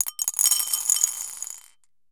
marbles - 15cm ceramic bowl - drop - handful of ~13mm marbles 05
Dropping a handful of approximately 13mm diameter glass marbles into a 15cm diameter ceramic bowl.
glass-marble, ceramic-bowl, marbles, dish, bowl, dropped, marble, ceramic, drop, glass, dropping